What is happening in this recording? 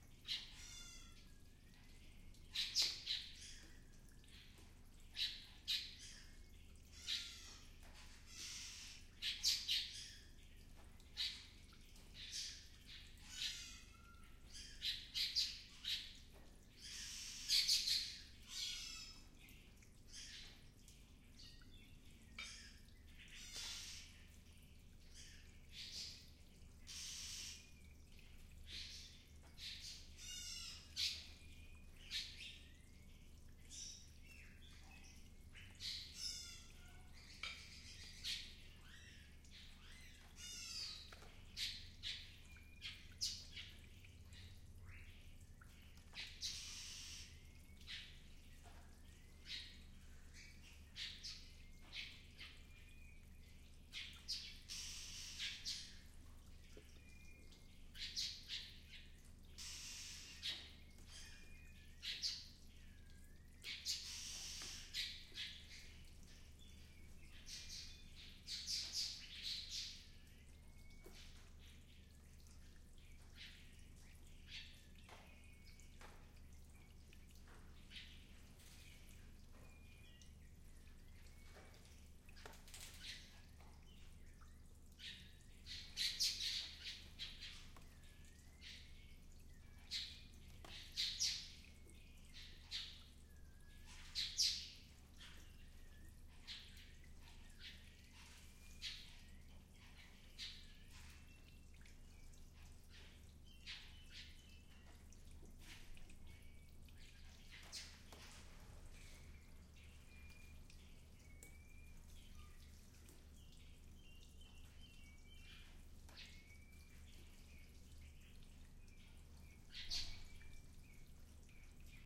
Field recording in the Leipzig Zoo. Birds are singing, water is dropping from the leafs.
birds, flying, drops, water, field-recording, bird, forest, nature